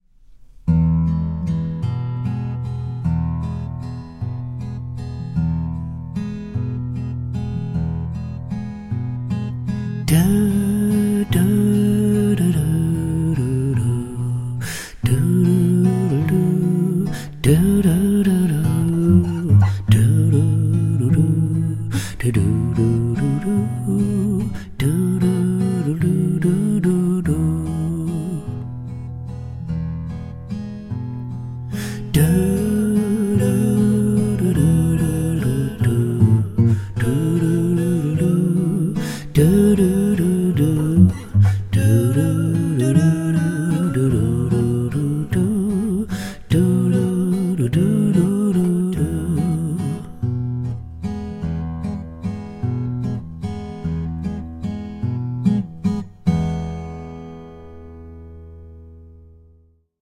The Doo Doo Song
A silly piece of music. No vocals, just "doo doo". No, not that kind of doo doo...